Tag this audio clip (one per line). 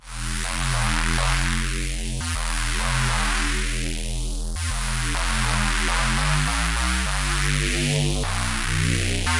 acid
bass
club
dance
dub-step
electro
electronic
house
loop
rave
saw
synth
techno
trance
wave